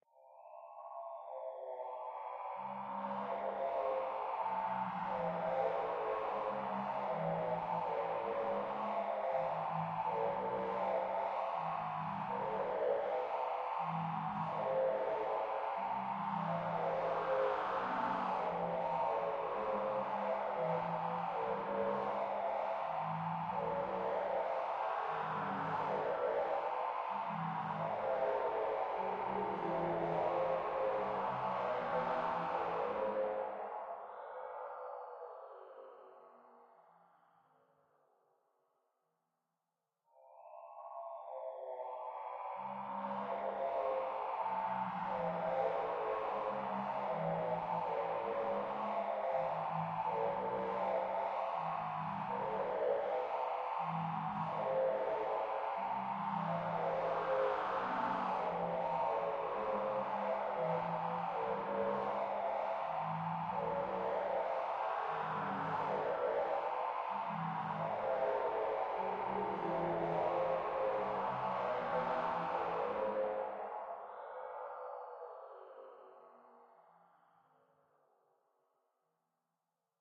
ambient, tense soundscapes and rumbles based on ambient/soundfield microphone recording inside a running train.
cinematic, subway, rumble, noise, processed, electronic, ambience, reverb, metro, theatre, atmosphere, dark, intro, eerie, strange, train, sci-fi, soundscape